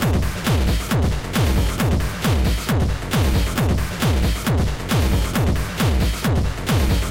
hard loud loop
industrial, loop, techno